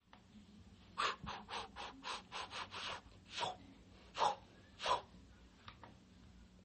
2. Cortes en el Viento
Cortando el viento
Face, more, once, time, wind